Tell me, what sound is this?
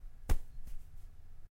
14 -Rebote en pasto
algo callendo y rebotando en pasto
callendo, pasto, rebote